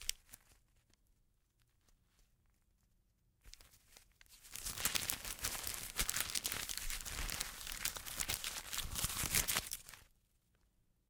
paper or money being shuffled or flipped through